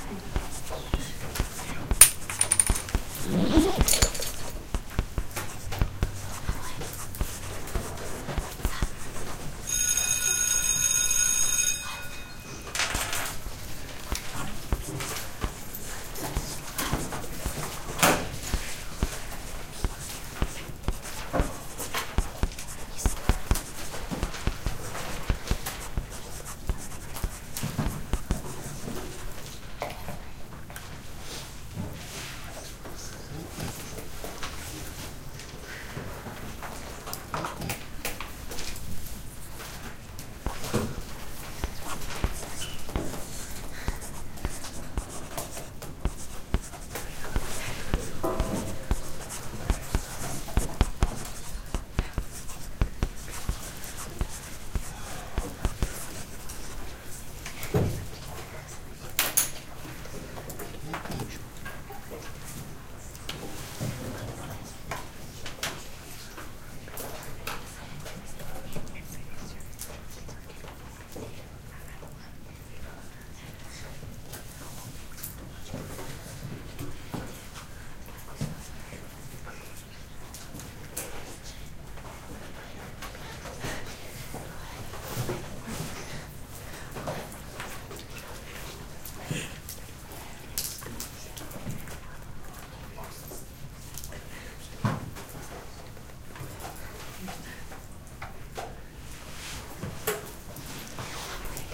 SCHOOL CLASSROOM AMBIENCE
COLLEGE CLASSROOM ENVIRONMENT
Students in class, whispering and writing. Chairs and backpack zippers are heard, the bell rings.
AMBIENTE AULA ESCUELA
Alumnos en clase, susurran y escriben. Se escuchan sillas y cremalleras de mochila, suena el timbre.
class, classroom, college, school, students, university